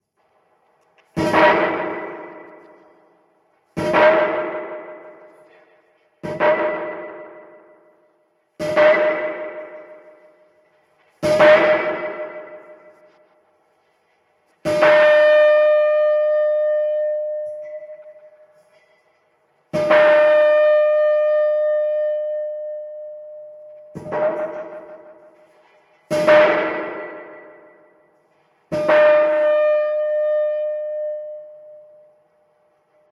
The file name itself is labeled with the preset I used.
Original Clip > Trash 2.